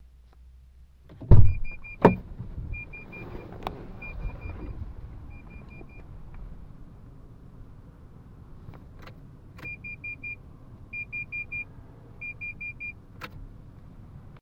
Car Door Porter Beeps Muffled
A car door opening followed by the open door alert beeps. Sounds a bit muffled.
Recorded with Edirol R-1.
alarm, alert, attention, beeps, car, door, inside, muffled, opening, opens